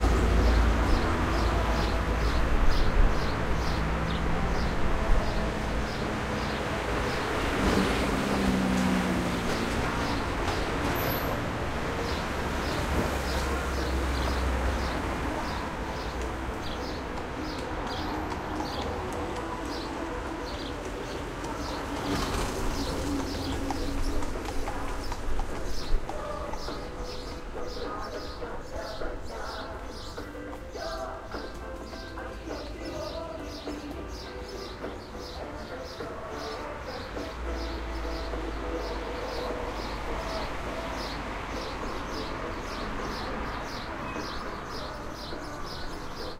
panphilova street 11 20
Noise of the Panphilova street, Omsk, Russia. 11:20am, saturday. Sound was written on balcony, 2nd floor. Racetrack placed across the street.
Hear noise of cars and trucks, sparrow tweets. Music from the racetrack (it's saturday). Clatter of hoofs.
XY-stereo.
truck, automobile, balcony, tweets, hourse, sparrow, city, noise, car, rumble, street, birds, racetrack, hools-clatter